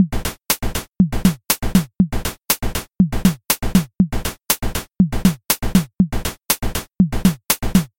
Drum Machine-Like Loop
This sounds kinda like a drum machine. I created in Audacity by generating various chirps and noises and editing them. It is 120 BPM.